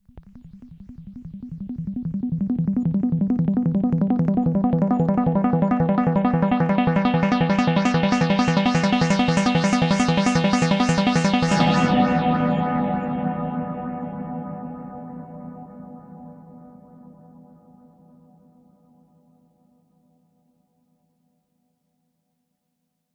Arp sequence of playing with my Minilogue by Korg.